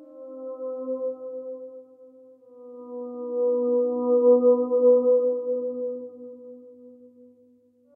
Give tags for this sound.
processed music dark ambience strange electronic voice atmosphere cinematic

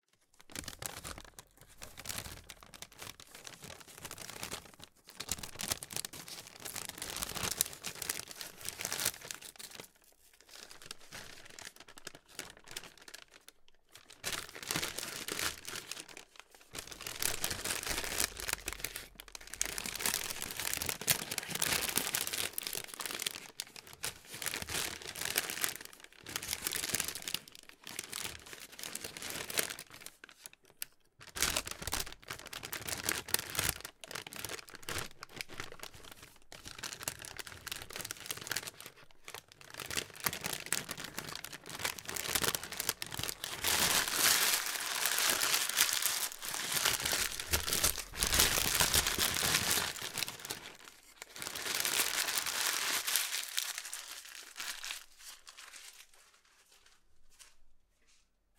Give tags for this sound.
Crunchy crumple paper-crunch

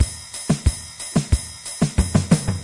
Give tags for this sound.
bpm drum 91 loop

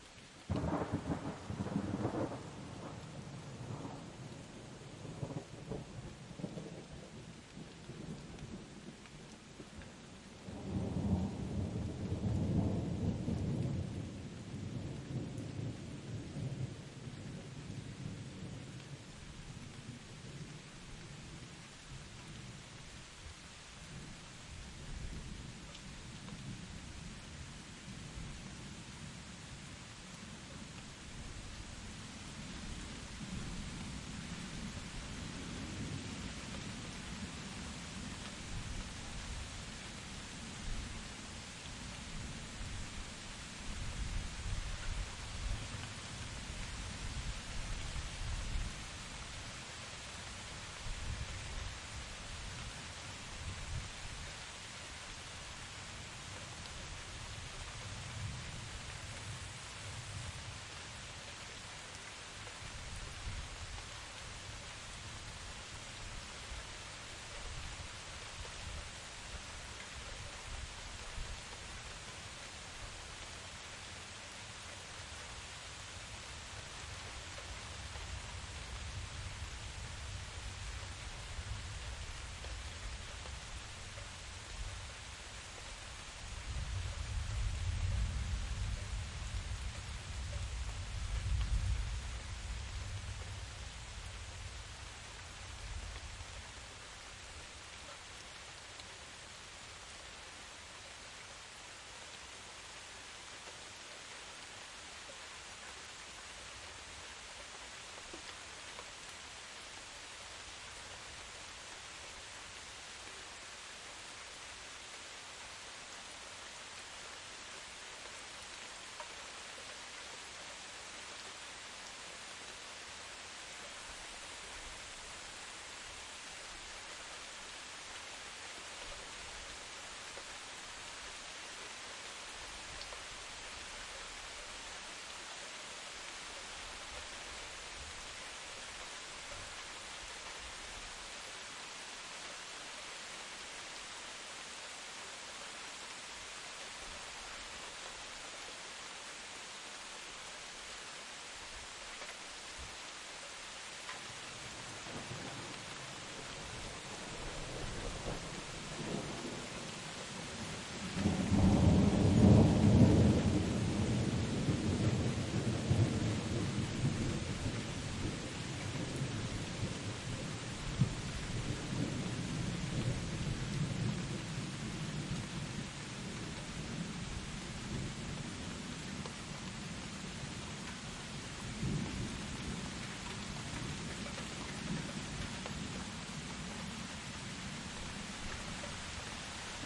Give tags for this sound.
weather rain storm field-recording thunder nature

